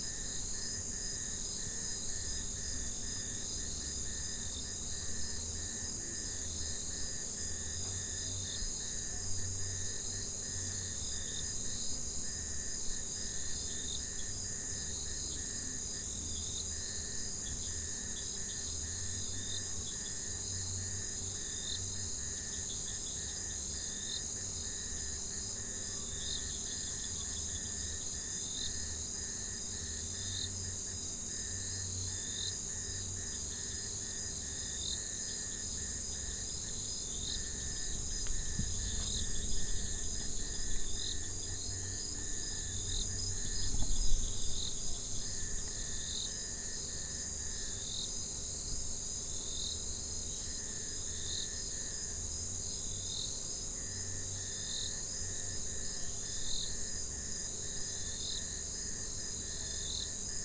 Recorded at Choco, Colombia